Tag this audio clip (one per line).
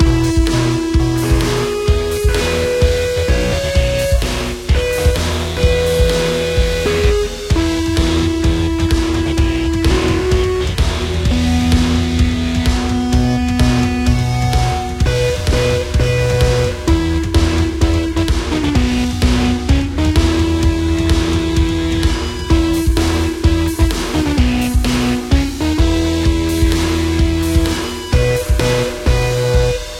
Electronic
Experimental
Loop